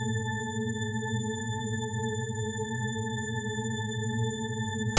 independent pink noise ringa
Independent channel stereo pink noise created with Cool Edit 96. FFT filter effect applied leaving only 220k, 440k, etc.
filter, noise, pink, stereo